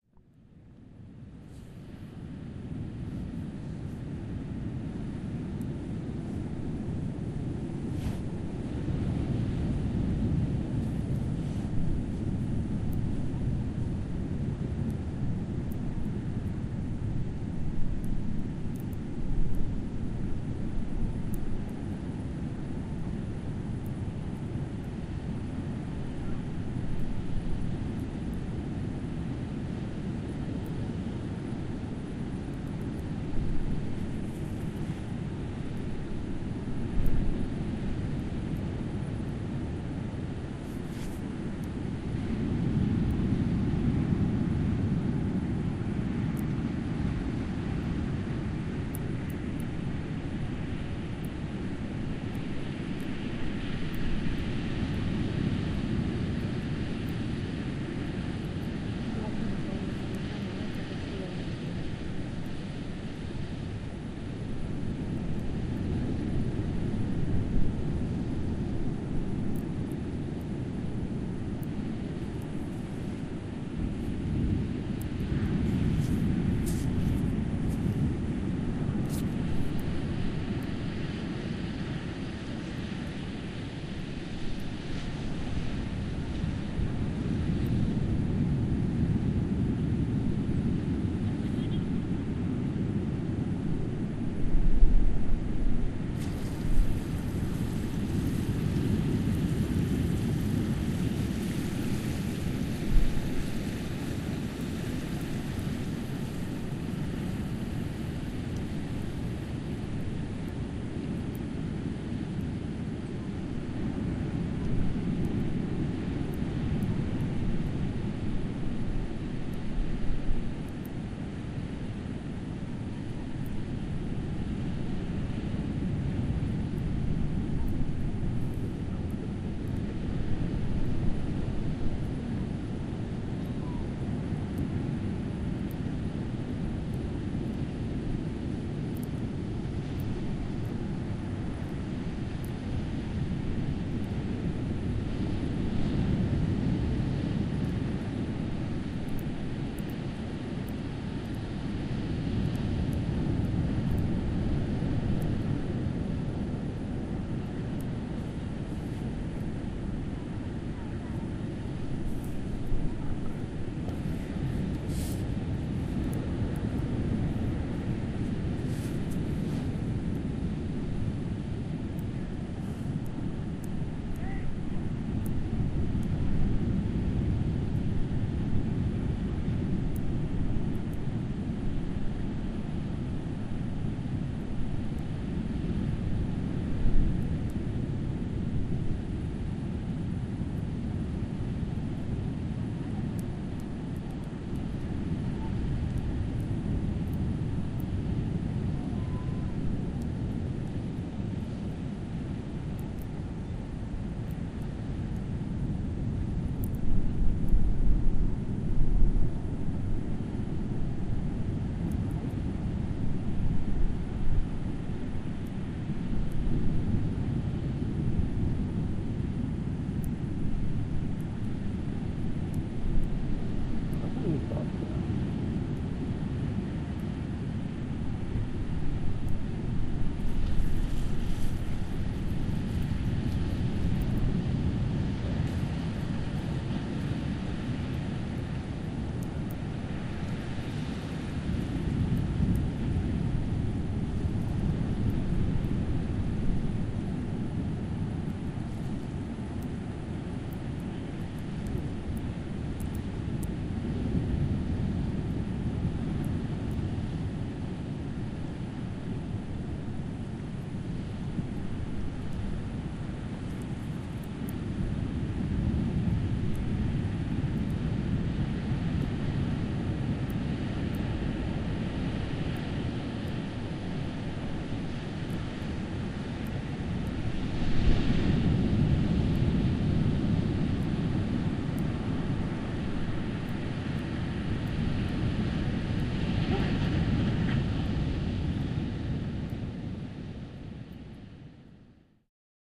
Lires, wind and seawaves
8/8/2011 - Tenth day
This is the last recording of the pack. On the way from Fisterra to Muxía we stopped in a very little town called Lires. This recording was done in the beach at sunset time. There was almost no-one there (although some voices can be heard in the background). It captures the calm in the beach with the sea-waves, the wind and the water.
This recording was made with a Zoom H4n. There are some problems with the windscreen. Actually, i had to discard a big part of the recording because of that...It was very windy and very hard to get a nice recording of the ambience.